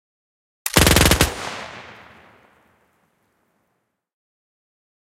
Submachine gun automatic fire
Made in ableton live, layered sub machine gun sound. Processed.
38, arms, fire, firearm, firing, gun, gun-shot, machine, military, rifle, shell, shoot, shooting, shot, sub, war, weapon